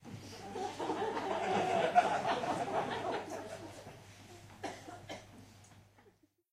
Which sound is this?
LaughLaugh in medium theatreRecorded with MD and Sony mic, above the people
laugh, theatre, audience, prague, auditorium, czech, crowd